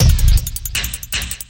special fx audio
audio, fx, special